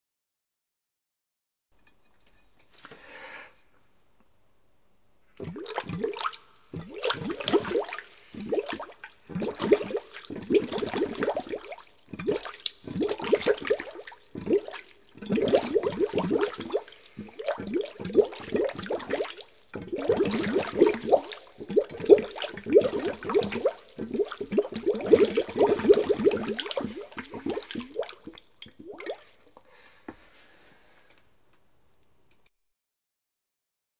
bubbling liquid
A large bucket of water and aluminium tube produced the effect of bubbling
mud, liquid, bubbling, cauldron